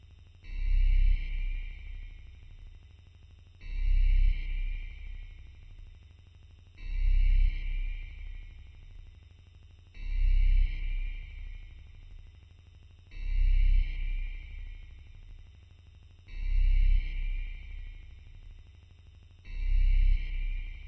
Alarm sound 11
A futuristic alarm sound